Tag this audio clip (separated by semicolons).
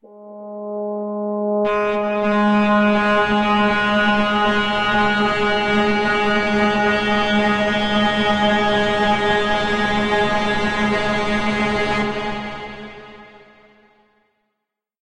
multisample; pad